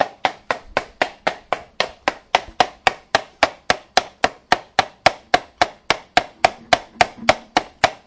Mysounds HCP Gaspard jar
This is one of the sounds producted by our class with objects of everyday life.